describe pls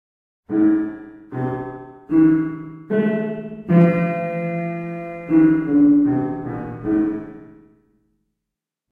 A music effect I made on my piano. The 101 Sound FX Collection.